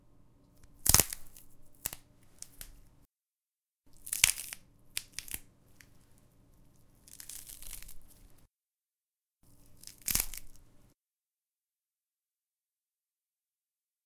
MrM CeleryBreaking
Recorded celery snapping in wooden sauna for use as foley broken bones sound. Edited with Audacity. Recorded on shock-mounted Zoom H1 mic, record level 62, autogain OFF, Gain low.